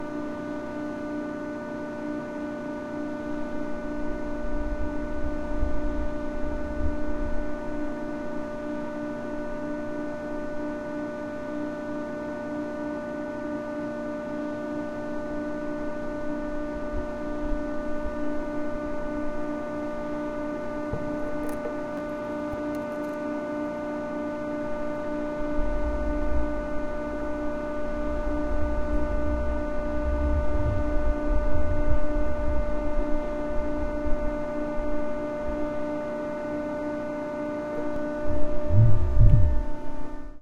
Machine Whirring
Some machine recorded through a grate in the ground.
binaural, hum, industrial, machine